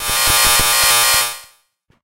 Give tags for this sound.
digital; random

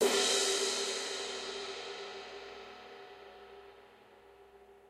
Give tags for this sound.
click; custom; cymbal; cymbals; hi-hat; Maple; Oak; one; one-shot; ride; Rosewood; shot; sticks

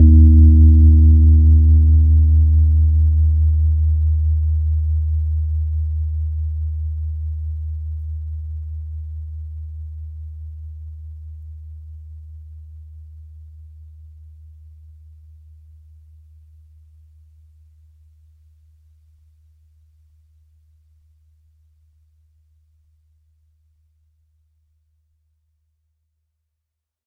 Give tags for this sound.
tube; piano; tine; multisample; fender; keyboard; electric; rhodes

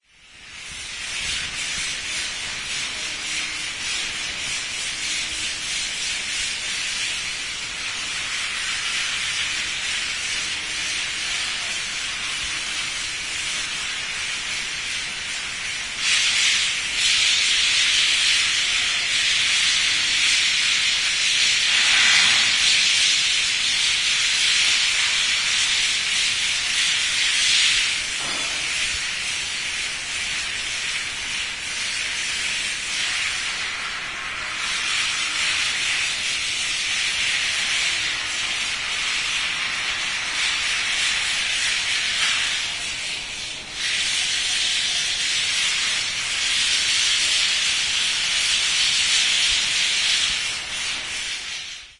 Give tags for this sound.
music; renovation; filed-recording; poland; stairwell; sanding

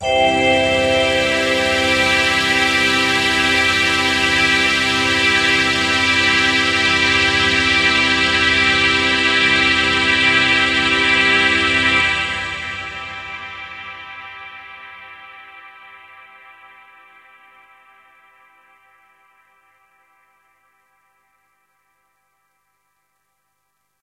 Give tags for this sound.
Instrument; Orchestra; Space

